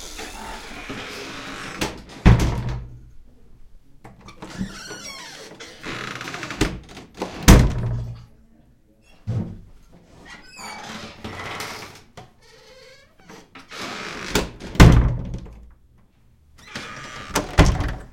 wood door old rattly open close creak edge catch on floor
close, old, open, rattly, door, creak, wood